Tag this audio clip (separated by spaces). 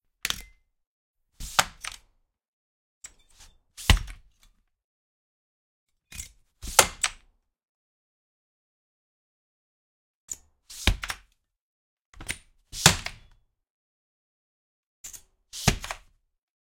bus school slide truck